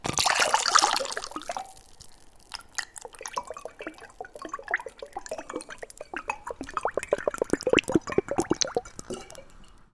Pouring liquid from a bowl into a bottle using a funnel. Recorded with an AT4021 mic into a modified Marantz PMD661.